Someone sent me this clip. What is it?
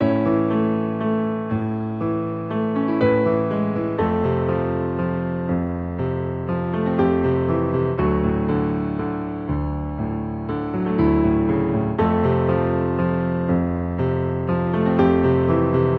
Piano Chord Progression (F - 120 BPM)
Smooth melodic chord progression. Key: F, 120 BPM. Perfect for all cinematic music styles.